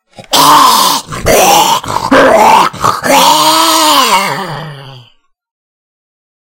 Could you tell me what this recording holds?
Zombie Burst
Creature, Growl, Horror, Monster, Roar, Scary, Scream, Zombie